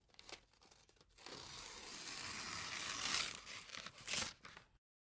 Printer paper ripping
a sheet of white printing paper being ripped in half. recorded on a Zoom H6 portable digital recorder,rifle mic
long-tear, OWI, paper, printer-paper, rip, ripping, tearing, white-paper